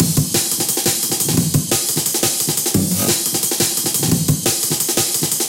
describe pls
175BPM Amen Punchy Loop
just a quick loop I made with the amen break, some samples and a little parallel processing. Enjoy